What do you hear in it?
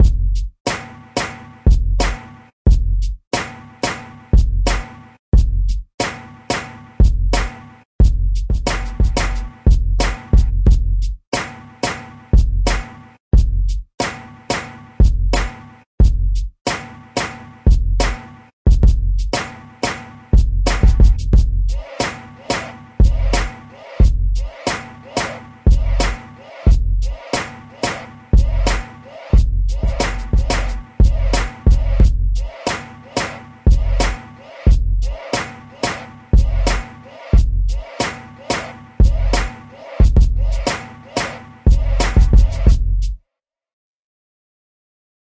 East Coast Drum Loop Tempo 90 - Nova Sound
Sound, hip, FX, percs, Drum, dance, r, drums, groovy, Nova, 90, funky, beats, NovaSound, Coast, rubbish, East, b, sandyrb, SoundNova, Loop, Tempo, beat, hop